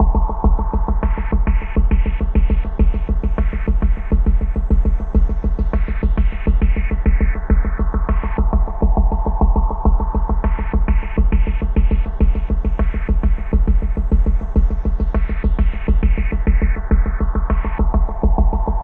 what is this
102 space gasps
This is a break I made to layer over my breaks at 102bpm. It was created in free tracker Jeskola Buzz using VST instrument, Alchemy, Multiloop2-Move Knob 1. This is NOT a looped sample from the instrument, I created it using the tracker, then applied an Ohm Boys Delay effect. Sounds great over the breaks at 102. Enjoy :)
102, 102-bpm, 102bpm, alchemy, alien, atmosphere, atmospheric, bass, frequency, gasps, infoatstezzerdotcom, knob1, line, noise, padding, sequence, space, vocal